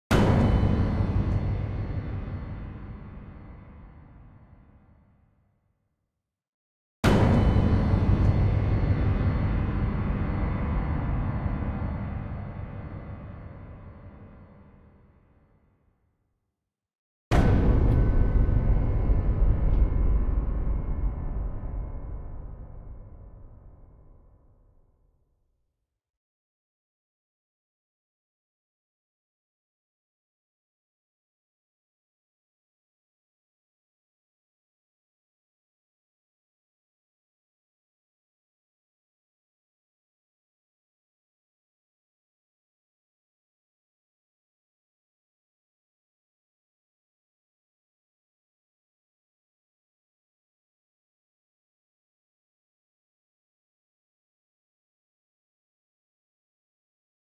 realizations or fighting
scary piano key hits with reverb used for a film project I just finished
effect, sound-effect, scary, suspense, sound, industrial, hit, design, fx, reverb, piano, horror, terror, soundboard, key